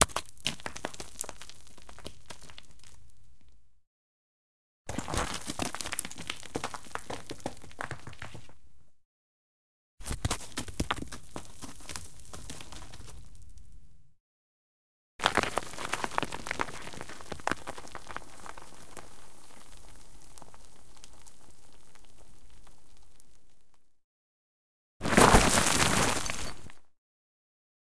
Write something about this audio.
Foley Stones Falls Debris Stereo DR05
Stones movements (x5) - Falls & Debris.
Gears: Zoom H5
drop, fall, floor, impact, stereo, stone, stones